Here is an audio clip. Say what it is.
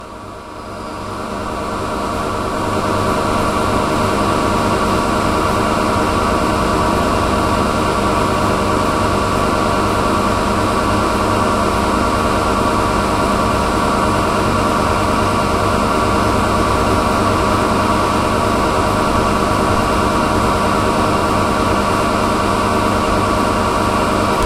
Heat Station
Noise of an electric motor in a heating station
machine, electric, noise, motor